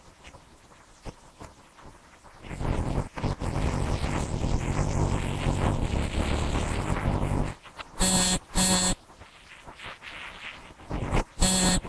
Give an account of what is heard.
Whenever I use my USB stick, my computer always makes an awful noise. I decided to record it and see if any of you guys can make anything out of it.
To me it sounds like some sort of aliens or robots talking to each other.
The only editing done was noise removal to get rid of the fuzziness and amplification to make it a little bit louder.